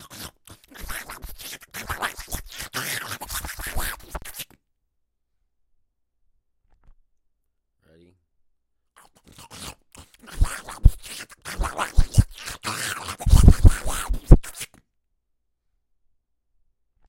Exaggerated messy sloppy eating sound. Recorded with H4 on board microphone.
human, silly, voice